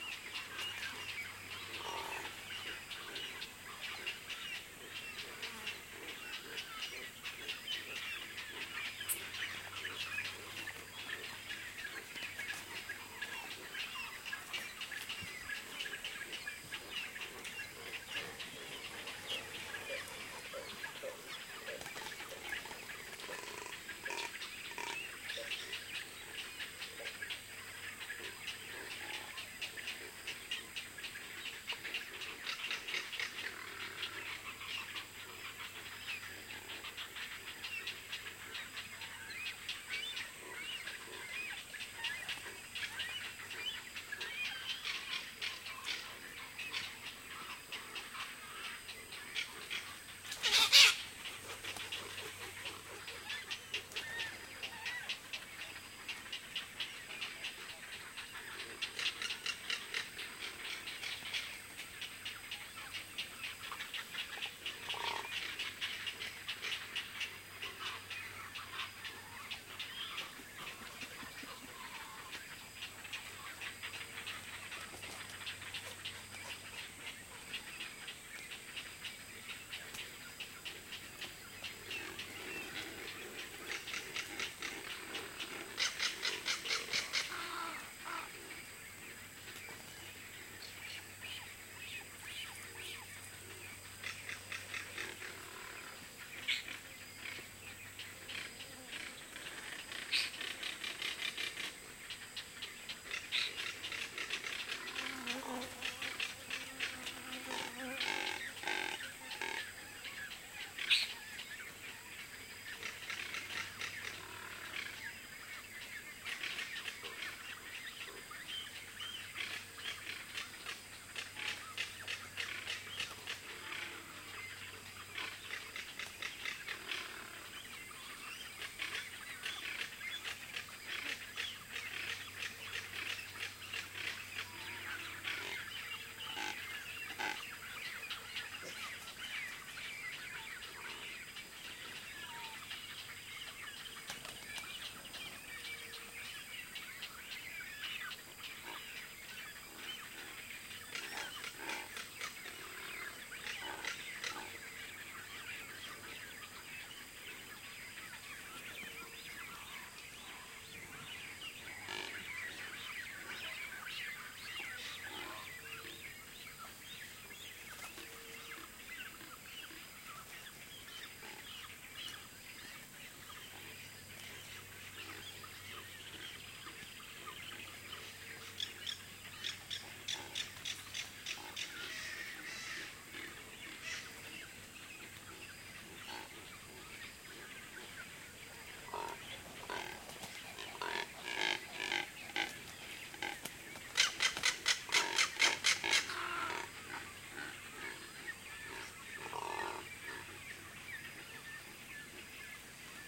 Brazil Pentanal insects birds 01
ambiance, ambience, ambient, birds, birdsong, Brazil, day, field-recording, insects, nature, Pentanal, wetland